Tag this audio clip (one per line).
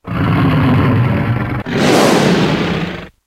attack
beast
creature
dinosaur
dragon
growl
monster
roar
roaring
snarl
snarling